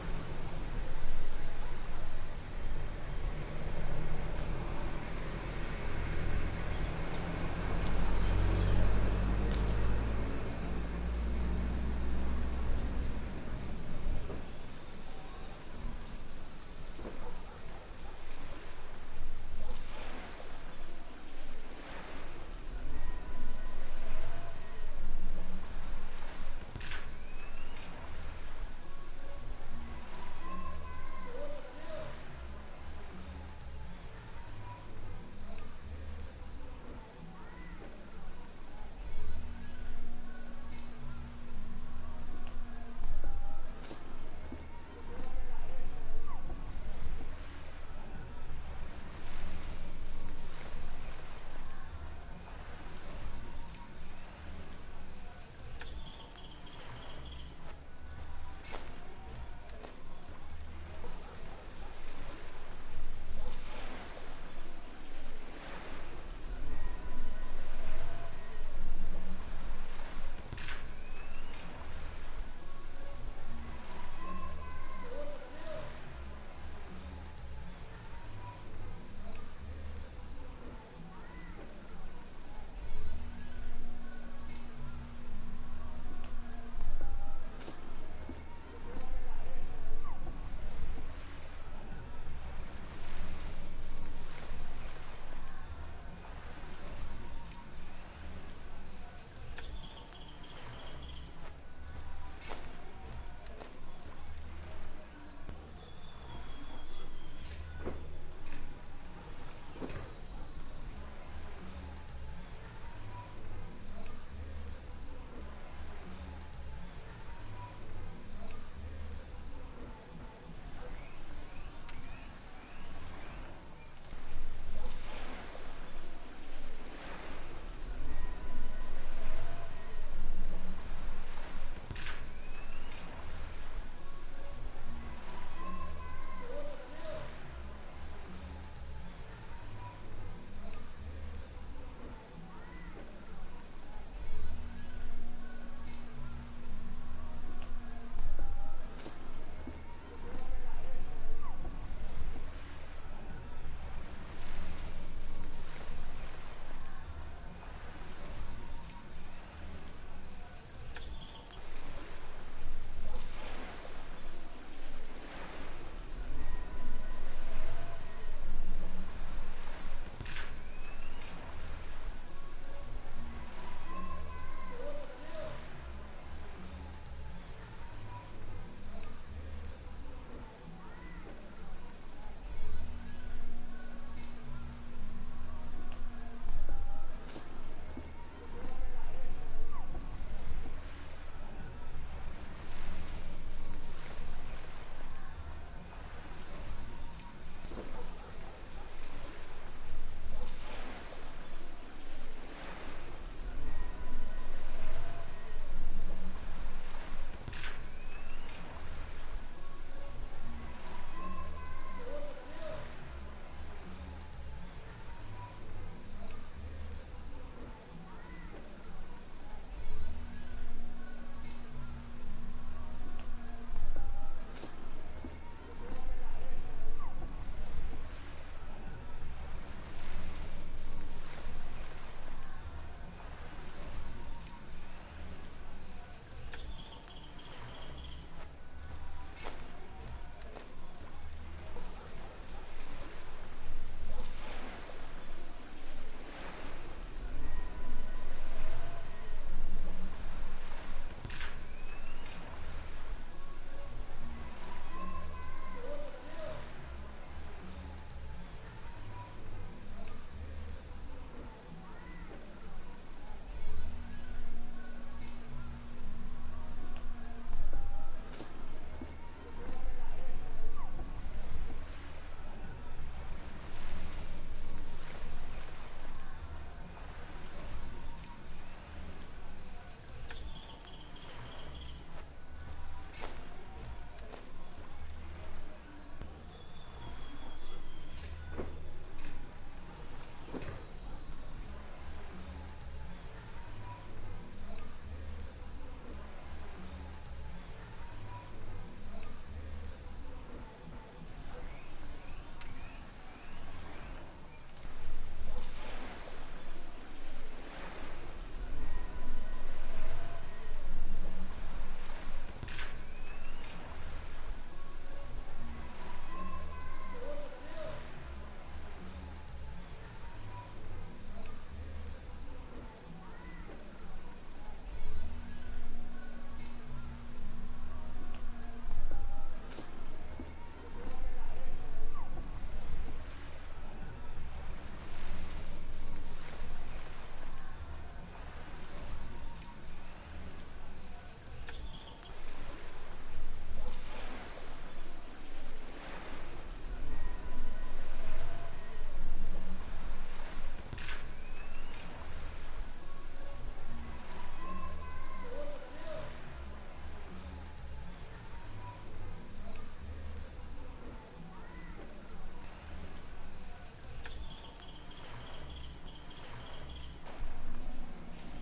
ambience afternoon small village
Field-recording of the ambience in a small rural village in Nicaragua. You can hear a car, near and distant birds, kids and fathers voices, the waves from the near Managua Lake, and some other bugs typical of the afternoon sounds.
small-village, lake-waves, car, bird, human-voice, man, Nicaragua, afternoon, kids